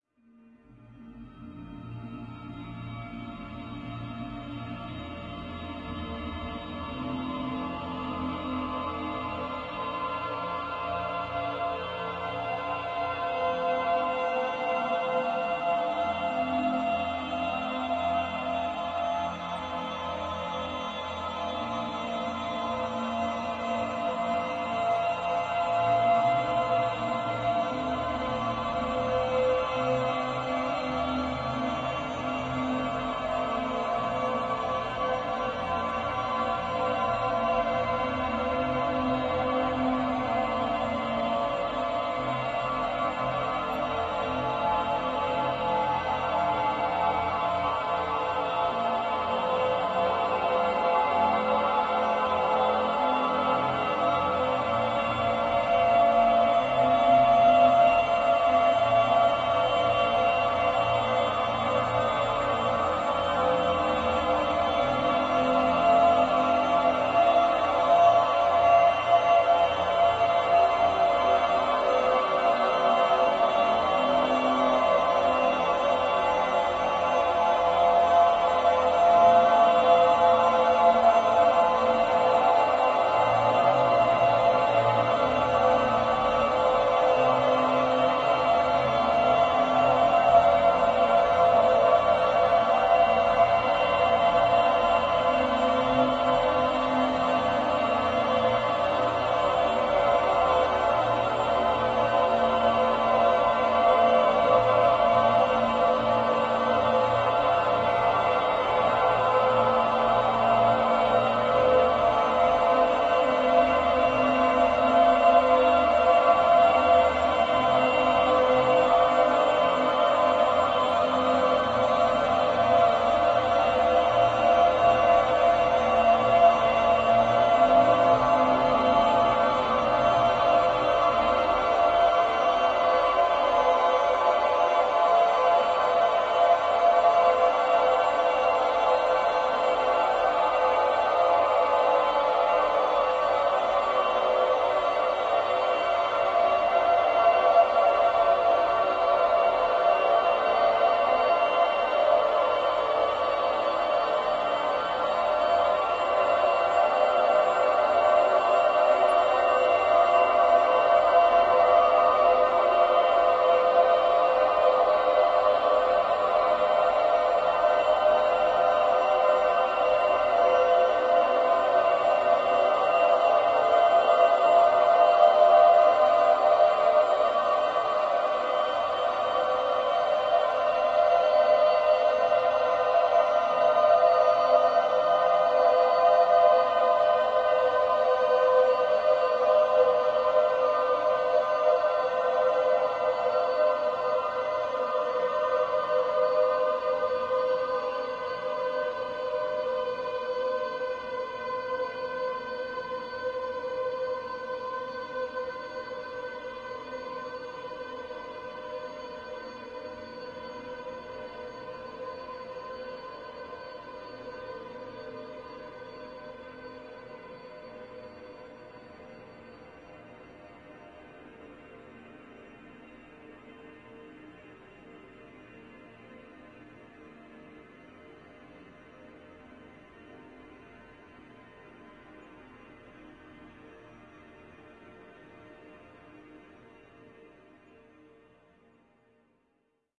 LAYERS 018 - ALCHEMIC HUNTING was created using Camel Audio's magnificent Alchemy Synth and Voxengo's Pristine Space convolution reverb. I sued some recordings made last year (2009) during the last weekend of June when I spent the weekend with my family in the region of Beauraing in the Ardennes in Belgium. We went to listen to an open air concert of hunting horns and I was permitted to record some of this impressive concert on my Zoom H4 recorder. I loaded a short one of these recordings within Alchemy and stretched it quite a bit using the granular synthesizing method and convoluted it with Pristine Space using another recording made during that same concert. The result is a menacing hunting drone. I sampled every key of the keyboard, so in total there are 128 samples in this package. Very suitable for soundtracks or installations.